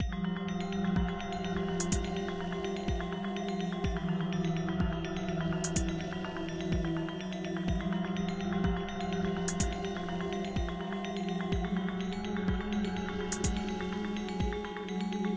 tension01 loop
A Simple loop to create anxiety.
ascending, anxiety